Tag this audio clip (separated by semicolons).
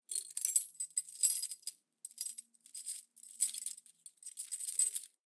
Real; Jingle; Rattle; Sound; Door; Lock; Keys; Foley; Jingling; Key